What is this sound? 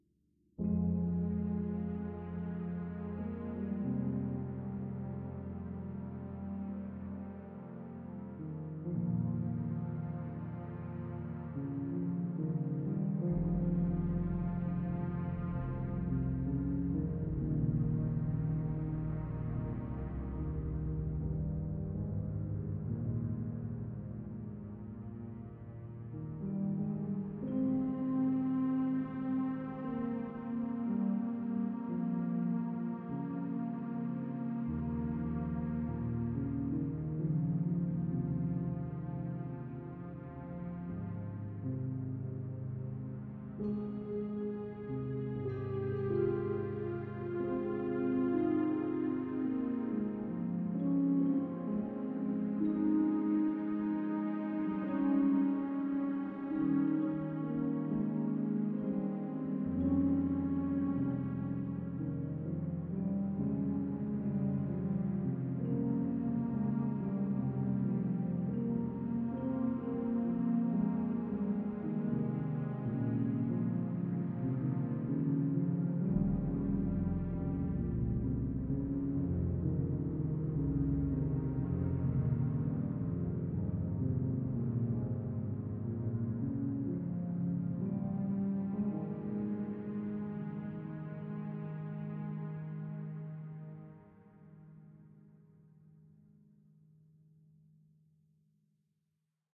Sad Movie sounds 02
Movie, Film, Free, sound, Cinematic, Sad